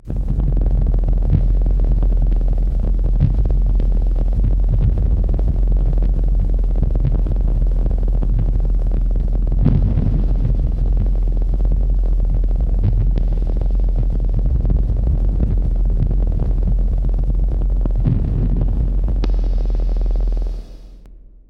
I gappered the sound using a high frequency; thus adding some silent moments. Pitched it down using Soundforge and added some reverb. I emphasized the low frequencies using a compressor(kjaerhus
plug-in) and an equalizer(Soundforge). You hear a very low rumble, a
bit like an engine running and on top of that some sounds of objects
hitting the ground in varying frequencies; some low and some mid
frequencies.